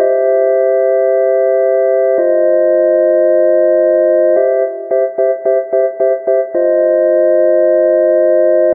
A member of the Delta loopset, consisting of a set of complementary synth loops. It is in the key of C major, following the chord progression C7-F7-C7-F7. It is four bars long at 110bpm. It is normalized.